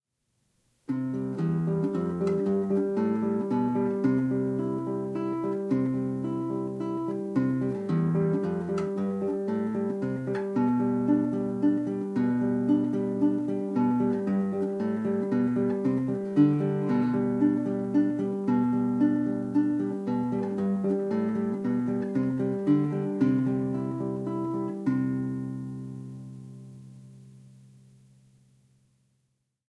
MERRY DANCE 1st PART(FS)
This is the 1910 Max Amberger guitar recorded with my Yamaha Pocketrak and edited on Sony Vegas. This is only the first part of the tune. Thanks. :^)